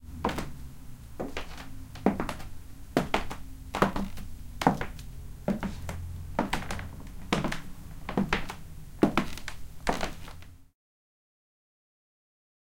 High Heels 1
A person walks in high heels.